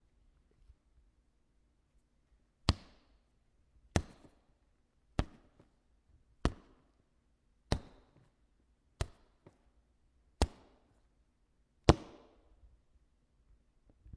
Me hitting a kicking bag with a bamboo rod.